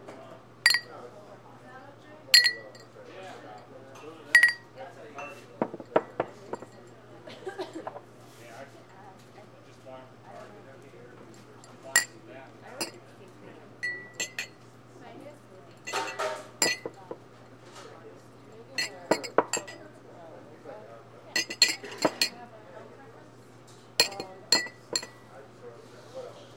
This is a recording of empty glasses clinking together on th ecounter of the Folsom St. Coffee Co. in Boulder, Colorado.